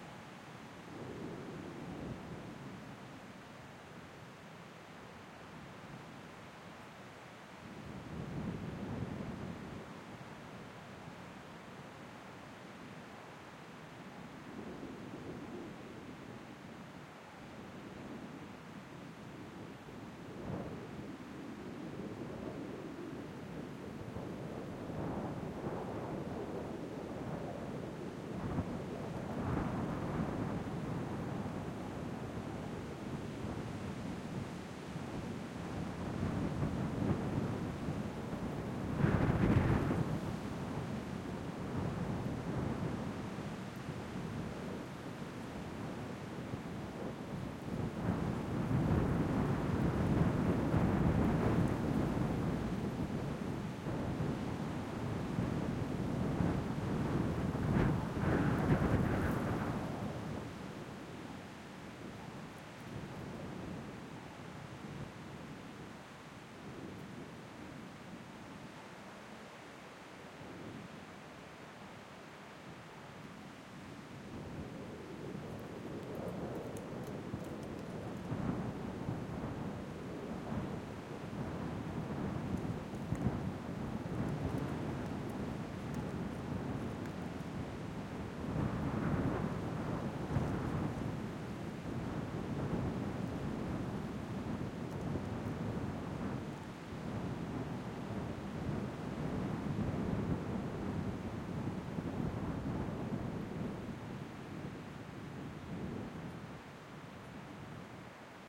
BeforeTheRain(Wind)
Heavy winds announcing an upcoming thunderstorm. Went out to record the dusk chorus but ended up scrambling to get out of the rain. Forest foliage heard in the background. Sennheiser MKH 60/30 MS microphone pair on a Sound Devices 702 recorder. Matrixed to L/R stereo at the recorder stage. Normalized to -12dBFS.
field-recording, nature, outdoor, storm, weather, wind